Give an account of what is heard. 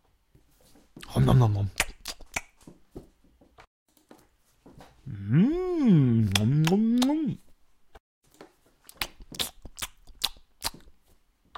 Filling the tummy with poor manners :D